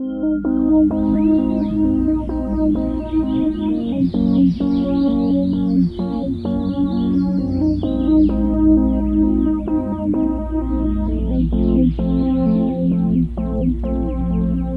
Light Pad 2
2/15 in the light pads collection. This pad features a deep, resonant frequency behind a slow trance-esque melody and a recording of birds.
bass, heavenly, light-pad, nature, pad, resonant